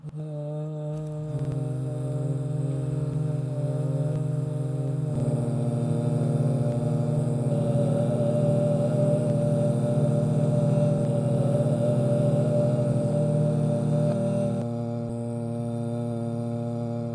badly sung voice triad
triad, voice, sing